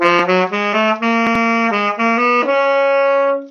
sax-phrase-T5-6
Tenor sax phrase. Sample I played and recorded with Audacity using my laptop computer built-in microphone Realtek HD. Phrase 6/7.
jazz, sampled-instruments, sax, saxophone, sax-phrase, tenor-sax, tenor-sax-phrase